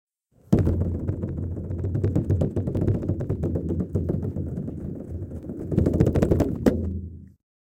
Thunder Drum
This is tupperware (beliebecuyy with an Oem stretchy rubber top cover that seals them water/air tight, So I enjoyed the tone & recorded this. Hope Everyone diggs it!
attack, Drums, tribalDrums, War, WarDrum